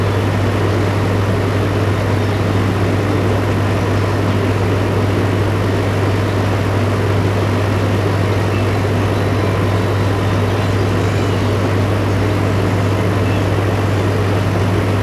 rumble of an engine, maybe a compressor of some kind. Sennheiser ME62 > iRiverH120 /retumbar de una maquina, un compresor quizás
rumble, motor, house